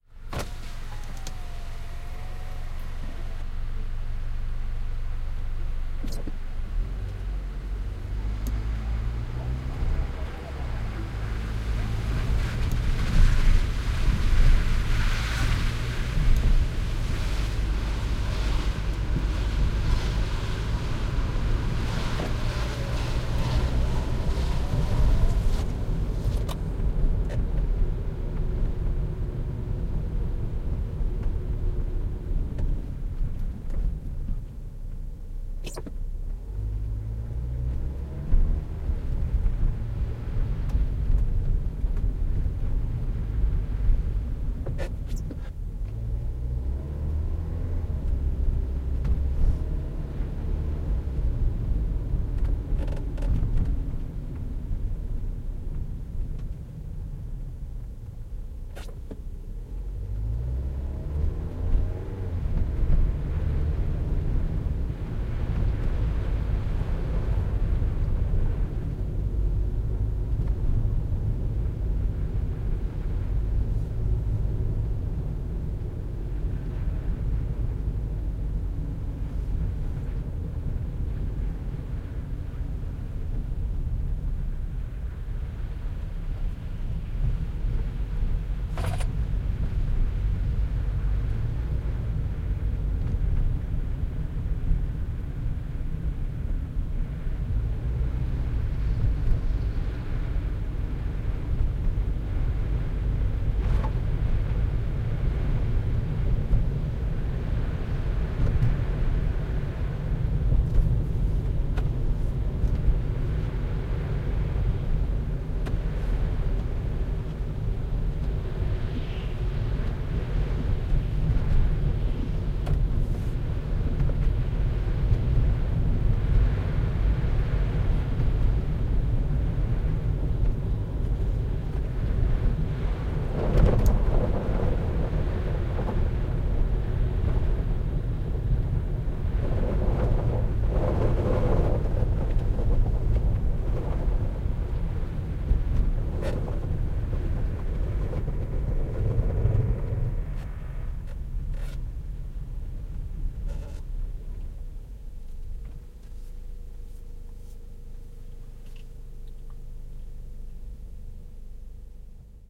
Car Toyota interior ride fast stops wet snow bumps street
Car, Toyota, bumps, fast, interior, ride, snow, stops, street, wet